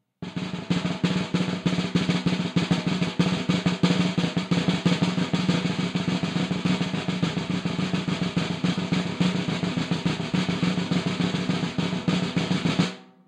Snaresd, Snares, Mix (8)
Snare roll, completely unprocessed. Recorded with one dynamic mike over the snare, using 5A sticks.